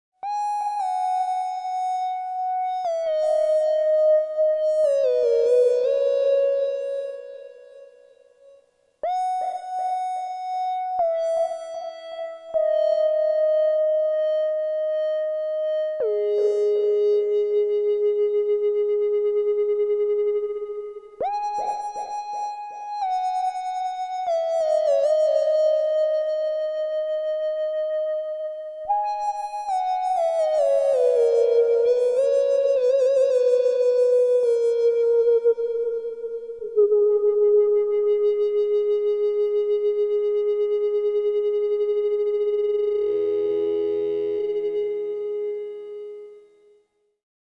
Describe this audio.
Synth lead created on the Minibrute, tweaking the KBD Tracking and LFO rate dials. Enjoy!